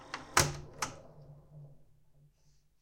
17 REWIND STOP
Recording of a Panasonic NV-J30HQ VCR.
cassette, retro, tape, vcr, vhs